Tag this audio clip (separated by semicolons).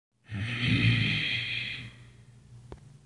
field-recording; human; rage